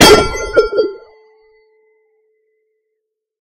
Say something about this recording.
stone on stone impact loud2 bell
a stone sample (stone_on_stone_impact) processed in SPEAR by prolonging, shifting and duplicating the partials
fx, loud, percussion, processed, hard, stone, bell